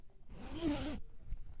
Opening and closing a zipper in different ways.
Recorded with an AKG C414 condenser microphone.
3naudio17, backpack, clothing, uam, zipper